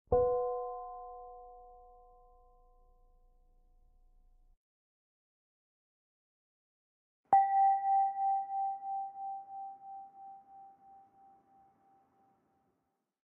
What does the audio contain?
Two glasses tapped with soft object